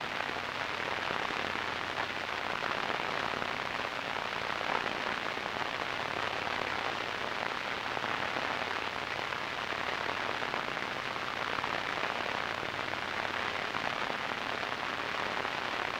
Granular textured white noise, static maybe? : An old television or radio?, scrambled communications.
This noise was created with Mother-32 and some PWM and hi-pass filtering, recorded in : Zoom H6 at -12dB.
( no extra processing )
This sound is part of the Intercosmic Textures pack
Sounds and profile created and managed by Anon